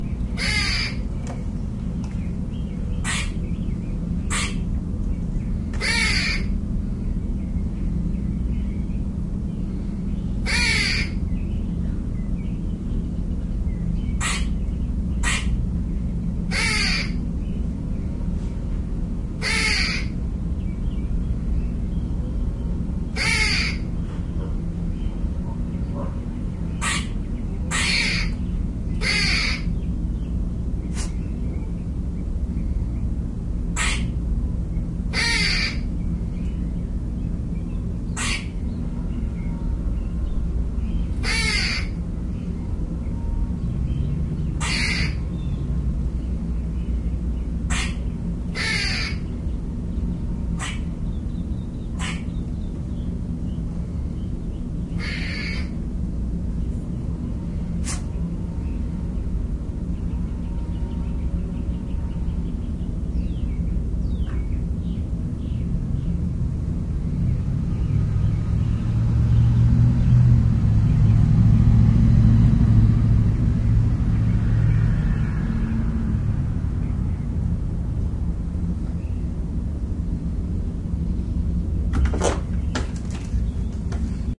angrysquirrel creepingtruck
Barking squirrel and passing truck collide in this ambient recording made with the Olympus DS-40/Sony Mic.
animal, bark, chirp